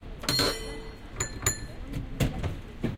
SF Cable Car (SFMT) Bell 1, then Bell 2
SF Cable Car bells ringing
San-Francisco, cable-car, bells